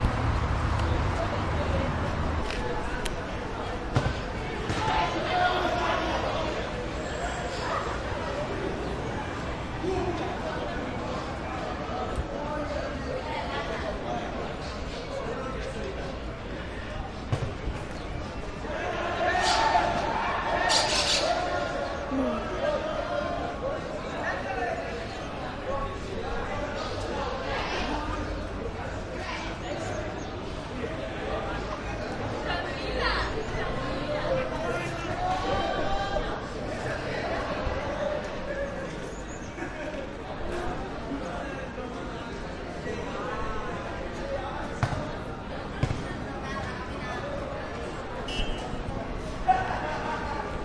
collab-20220510 PistesCanyelles Humans Birds Wind Complex
Urban Ambience Recording in collab with La Guineueta High School, Barcelona, April-May 2022. Using a Zoom H-1 Recorder.
Birds, Wind, Complex